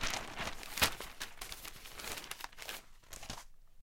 newspaper close drop
newspaper close and drop, Neumann U-87, ProTools HD
turn, pages